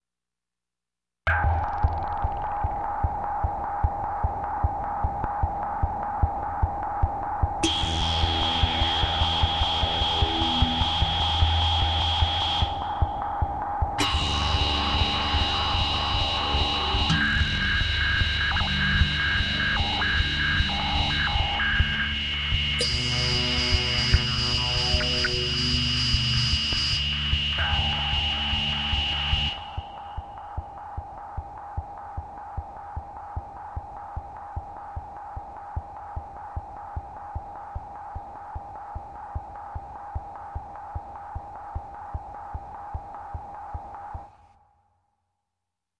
A sound created by using the GR-33 guitar synth. 150 BPM